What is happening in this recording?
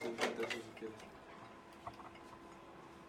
sonido maquina 2
sound, coffe, machine